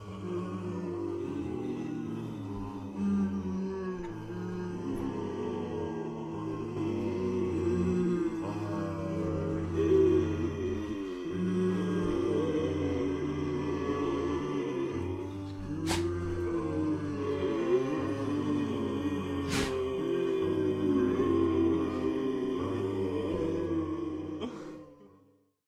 Zombie Group 8E
Multiple people pretending to be zombies, uneffected.
monster, roar, solo, zombie, dead-season, ensemble, snarl, horror, group, undead, voice